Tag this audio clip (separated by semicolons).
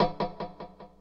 fx; experimental; percussion; bow; delay; violin; pedal